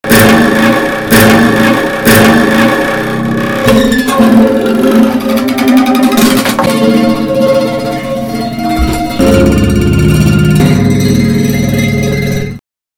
Rhythmical Vibrations
This sound is of an extended technique for classical guitar called
Rhythmical Vibration R.V
Classical, Extended, Guitar, Rhythmical, technique